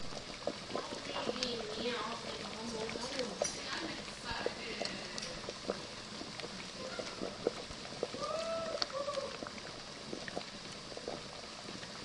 Bubbling turkey juices after coming out of the oven, close perspective recorded with DS-40.
sizzle, thanksgiving, cooking, turkey, field-recording, bubble, food, atmosphere, holiday